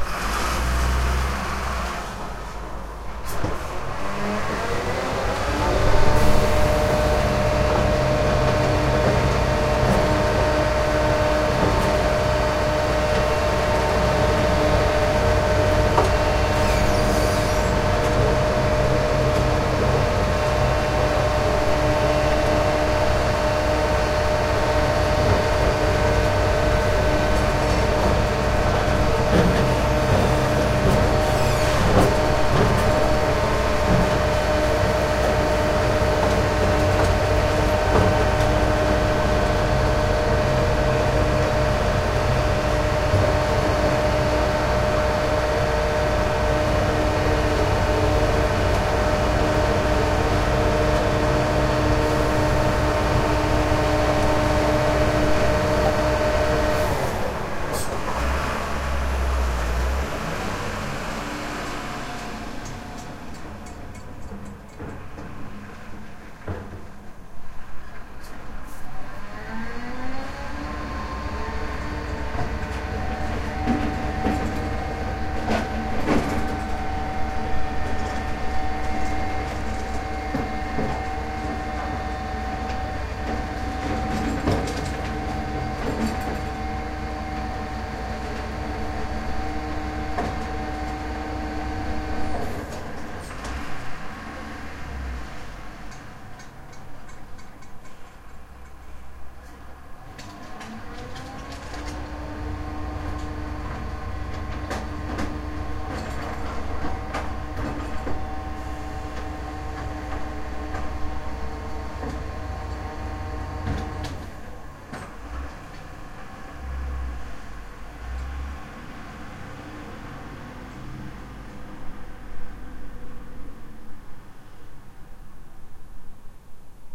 garbage collection
the garbage car stops for collecting garbage, goes to the next house and stops again for collecting garbage
can, car, engine, garbage, lorry, truck